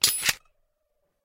rocket mode
game, video, games